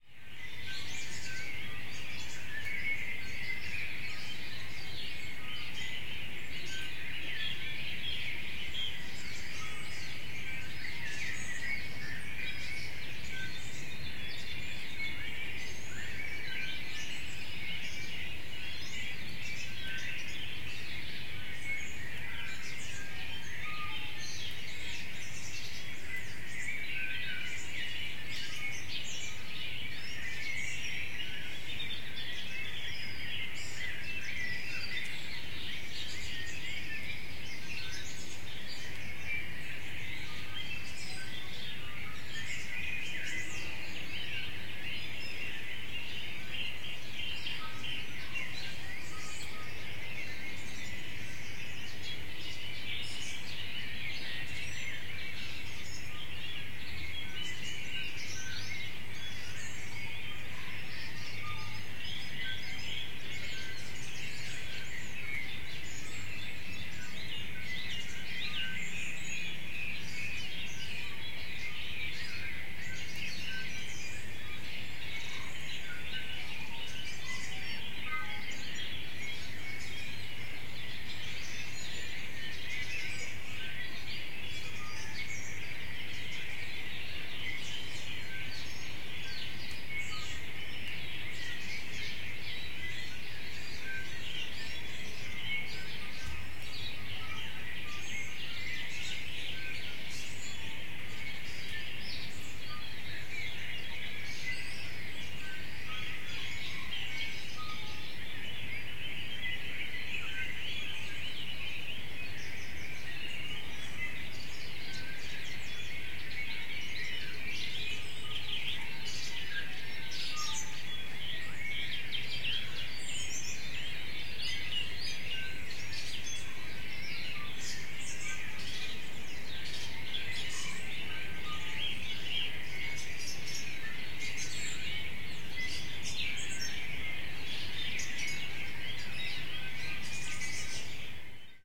Spring Dawn Chorus
This was recorded in front of my house in the suburbs of Hastings, Hawke's Bay, New Zealand.
It was recorded at around 6am on 5 October 2016 with a Zoom H4n.
You can hear many birds tweeting.